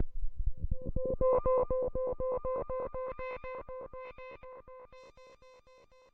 Moog laughing
moog, voyager